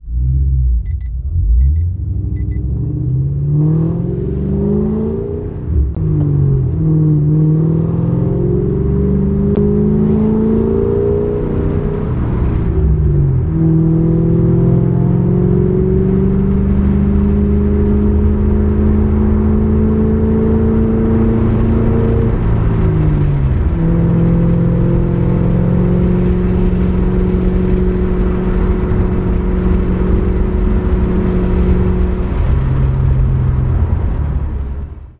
Sound of a Mustang GT500. Recorded on the Roland R4 PRO with Sennheiser MKH60.
car, drive, engine, fast, GT500, mkh60, mustang, passing-by, starting, stopping